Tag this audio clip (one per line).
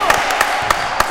basket
field-recording